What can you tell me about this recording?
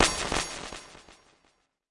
A percussive synth sound with delay.
This is part of a multisampled pack.